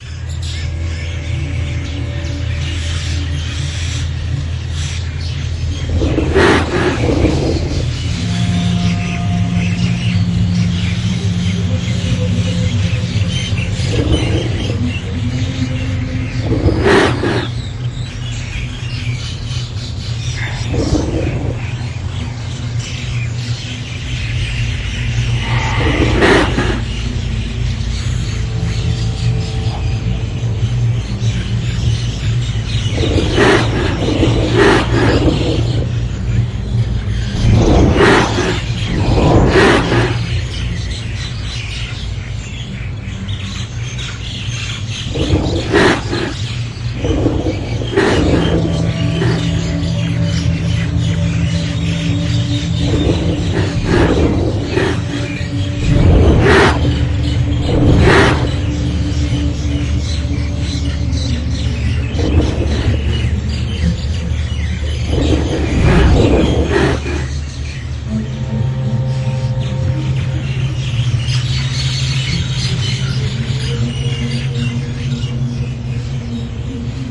Jungle Alien Planet Fantasy Creatures Beast Drone Atmo Surround